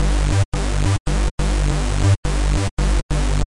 Cerebral Cortex Bass
bassline for hardtrance
bass, bassline, distorted, flange, hard, phase, techno, trance